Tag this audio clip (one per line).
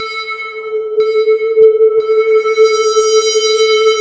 alien
galaxy
space
spaceship